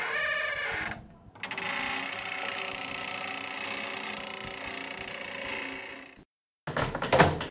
HAMOUDA Sirine 2014 2015 creakingDoor
Second track (7 seconds)
I recorded a door and i changed the speed rate (-22.641).
I increased the volume (+40%)
Typologie (Cf. Pierre Schaeffer) : V (Continu varié)
Morphologie (Cf. Pierre Schaeffer) :
1- Masse:
- Son "cannelé"
2- Timbre harmonique: terne
3- Grain: rugueux
4- Allure: plusieurs vibrato
5- Dynamique : l’attaque du son est violent
6- Profil mélodique: glissante, avec une séparation vers la fin
7- Profil de masse
Site : 1 strat de son qui descend dans le grave.
Calibre :
door, squeak, creak, hinge